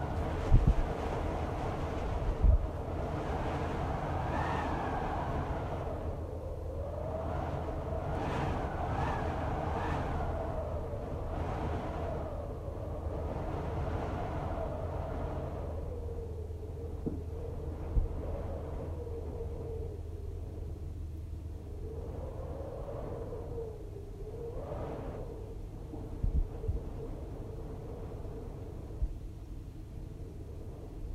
Howling wind through window in tower
Howling wind recorded in the tower at Nutfield Priory in Surrey, UK
howling, storm, gale, Wind, window